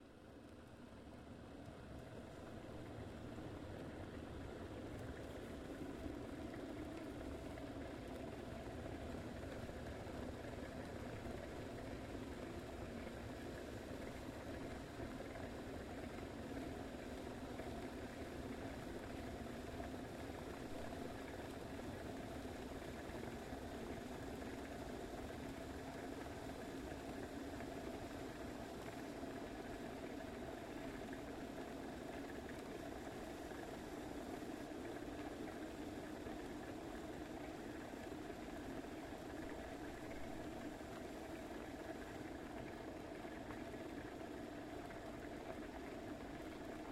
boiling water in electric kettle
* boiling water
* in kitchen
* in electric kettle
* post processing: removed click (00:00:15.1)
* microphone: AKG C214